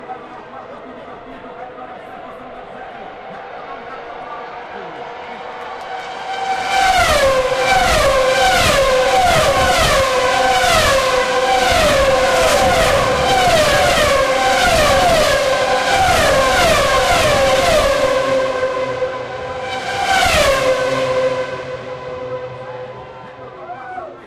F1 BR 07 1lap
Formula 1 Brazil 2007 race. Cars closing 1st-lap. Recorded at Grandstand B. Zoom H4, low gain